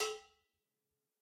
Some dirty drum sounds I sampled from one of my recordings.
Analog, Drum, dirty